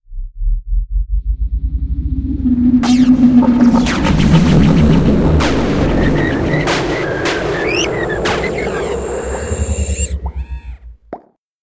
PortalMalfunction Long
Part of a series of portal sound effects created for a radio theater fantasy series. This is the sound of the portal when it malfunctions, in long-duration form.
portal, transporter, fantasy, mechanism, sparks, broken, sci-fi, malfunction